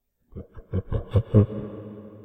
Short Evil Laugh 2
Sound of a man quickly laughing, useful for horror ambiance